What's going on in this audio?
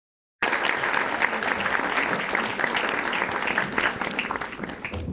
A fiel recording audio done in an auditorium of claps after a speech.